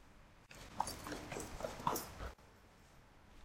stairway footwalk
Person walking downstairs. Recorded with a Zoom H2. Recorded at Campus Upf square.
campus-upf, footstep, run, stairs, stairway, UPF-CS13, walk